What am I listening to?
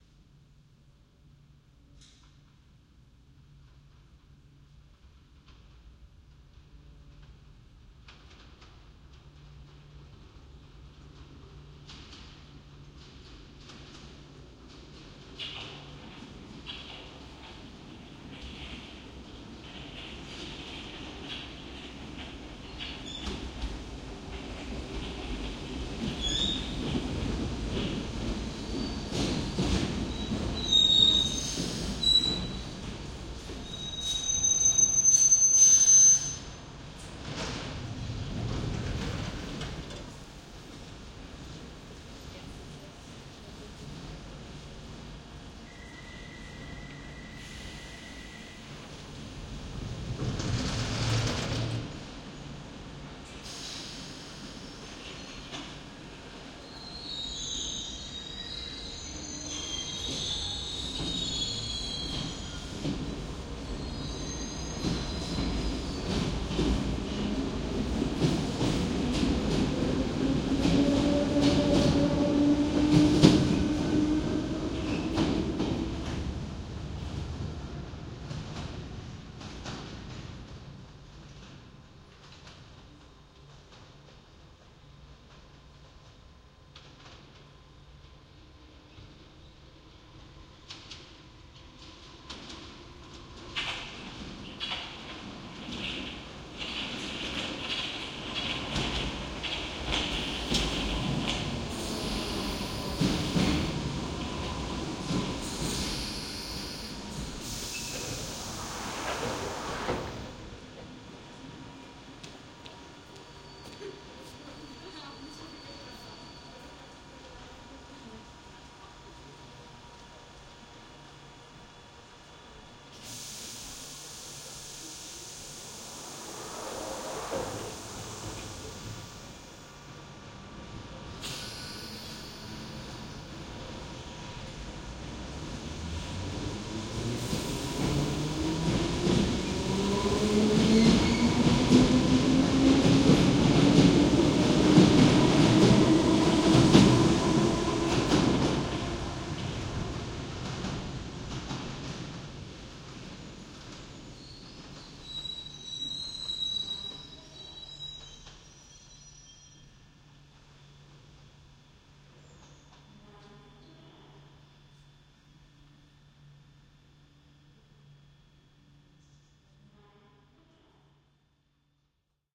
Underground Trains Binaural
Binaural recording of two passes from left to right of underground trains in London. Headphones essential
trains, underground, binaural